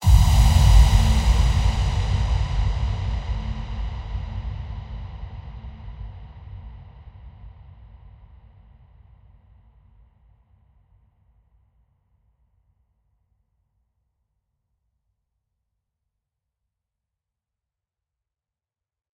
Vocal Bit
action, epic, film, free, horror, low, low-budget, mind-blowing, orchestral, raiser, scary, sound, suspense, thrilling, trailer, whoosh